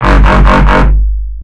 Dub Sound 4x G3 140bpm "Oew"
A dubstep saw made and modulated in Sytrus (FL Studio). 140bpm in G3. Left raw and unmastered for your mastering pleasure. Repeated 4 times at 140bpm. "Oew oew oew oew"
dub, dubstep, effect, electronic, flstudio, fx, robot, robotic, synth, warble